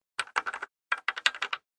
Buttons multiple 1
Pressing buttons plastic ones in a studio atmosphere with a Zoom H6.
button, button-click, buttons, click, game, plastic, press, push, sfx, sound, switch